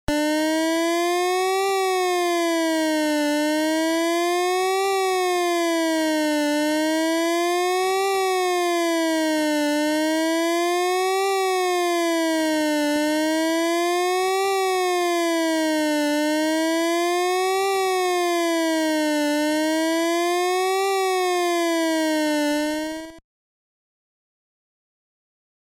8-Bit Siren Wail
A sound continually going up and down in pitch in the pulse channel of Famitracker to simulate a siren going off
siren, alert, 8-bit, wail, game, retro, video